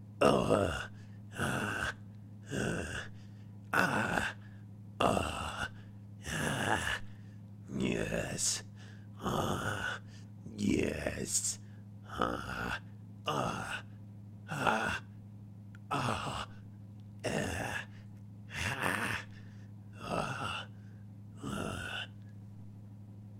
Content warning
A lizard man moaning for no particular reason.
voice, male, vocal, fantasy